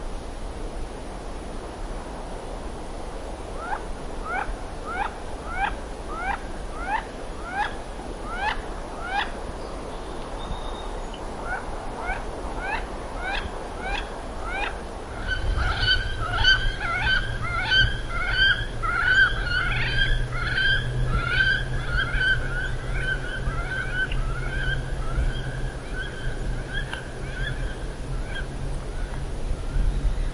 Wood ducks murmuring among themselves as they feed, and then flying off with alarm calls when they see me. Wind in the trees in the background. Recorded on an Olympus LS-14.
bird, birds, clucking, duck, ducks, field-recording, nature, wood-duck